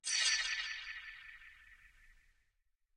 Knife slapback
Sharpening a knife with added delay and reverb.